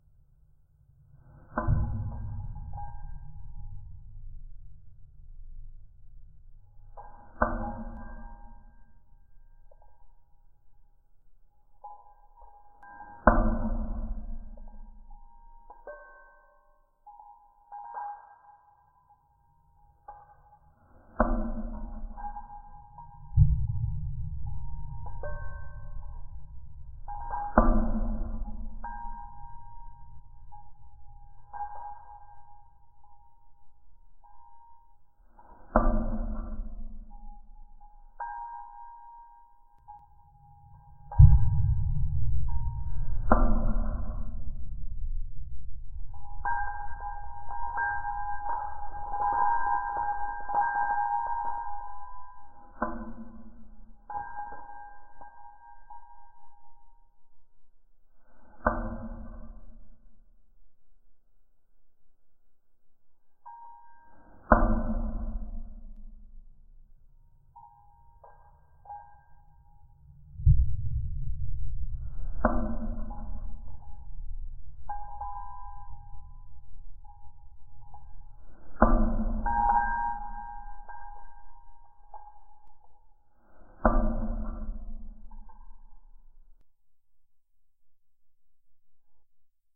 horror ambient factory
Sound of chains and the ticking on an iron rail mixed together and proccesed. Creates the sound of a creepy factory perfect for horror themed projects.
Sounds recorded with a phone and edited with audacity.
horror, sinister, factory, thrill, anxious, suspense, haunted, scary, creepy, terrifying, spooky, ambient